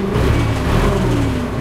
JCB Engine Rev Twice
Industrial, motor, Mechanical, engine, machine, Machinery, high, Buzz, electric, low